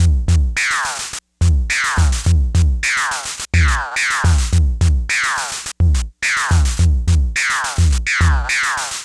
cs7 clone beat1
My custom CS7 drum synth
synth, cs8, drum